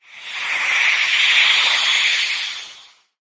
Poison Spell Magic
Poisoned magical spell. Designed with pushing a heavy object over a surface and time manipulation.